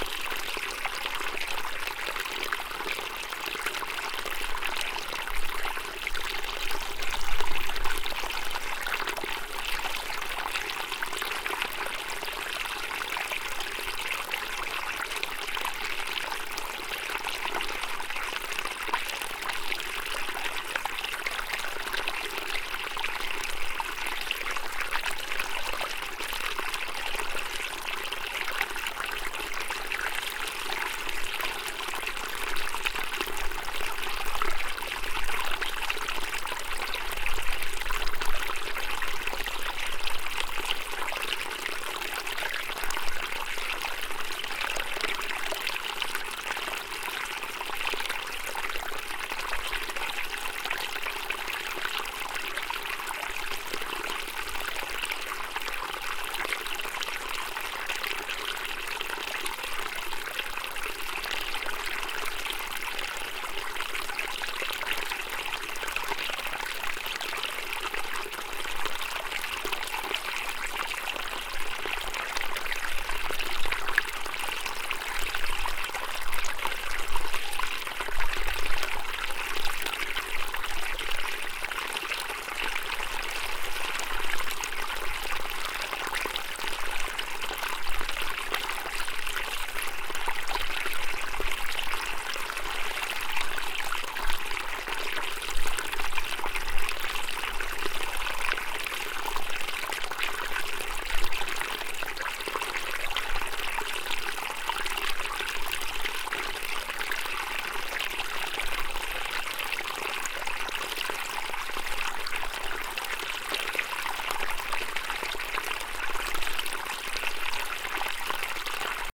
broken top creek 24
One in a series of small streams I recorded while backpacking for a few days around a volcano known as Broken Top in central Oregon. Each one has a somewhat unique character and came from small un-named streams or creeks, so the filename is simply organizational. There has been minimal editing, only some cuts to remove handling noise or wind. Recorded with an AT4021 mic into a modified Marantz PMD 661.
ambient, babbling, brook, creek, field-recording, gurgle, liquid, relaxing, river, splash, stream, trickle, water